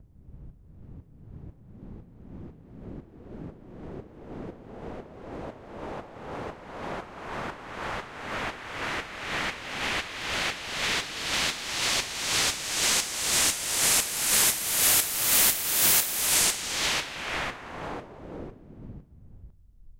A white noise sweep ran through a compressor sidechain.